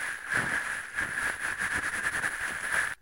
Plastic Bag
wrapping, bag, plastic-bag